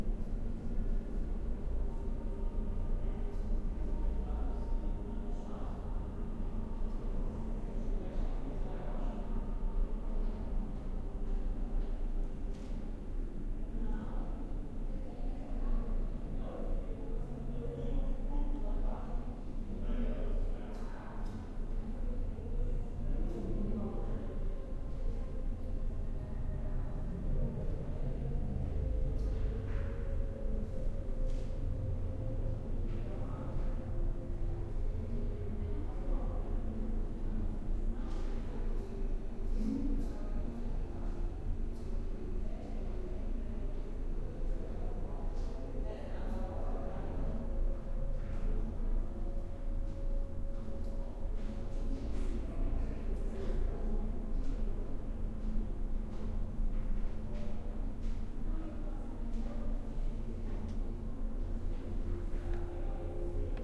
sagrada familia cathedral omni inside tower
This recording is done with the roalnd R-26 on a trip to barcelona chirstmas 2013.
chuch, cathedral, ambience, atmosphere, soundscape, ambient, background-sound